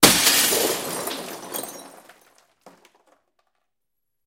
Windows being broken with vaitous objects. Also includes scratching.